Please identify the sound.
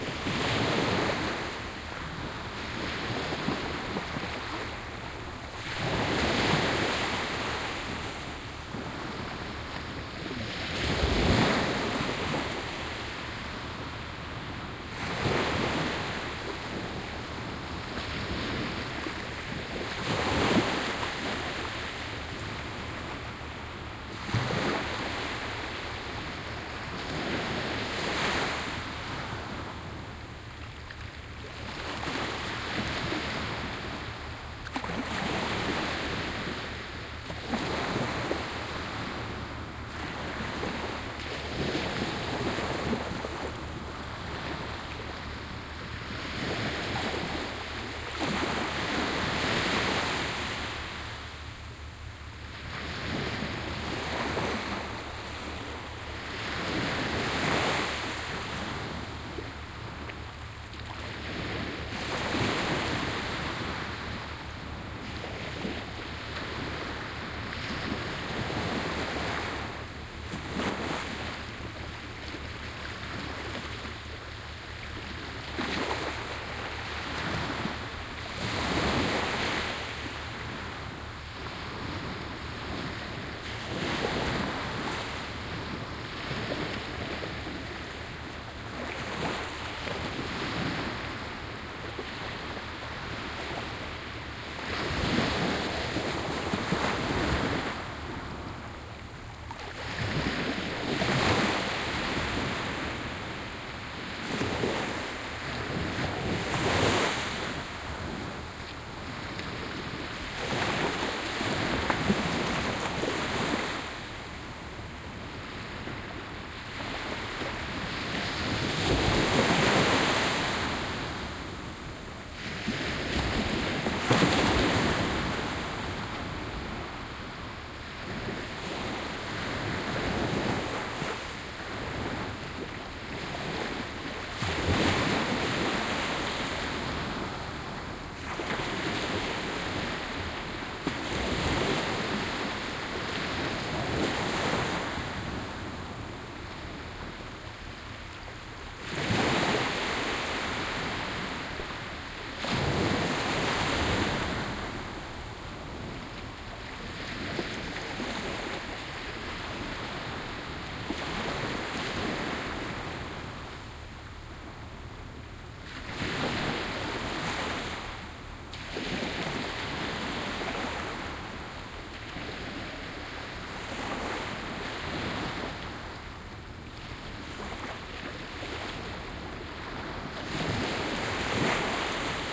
This recording was done in January 2009 at the brilliant beach of Skallingen, at the Esperance Bugt near Esbjerg / Denmark, which has been formed through the ocean´s depositings of sand within the last 300 - 400 years.
I used my OKM microphones with the A3 adapter and an iriver ihp-120 recorder.
I took a picture of the beach, which you can see here:
sea, ocean, beach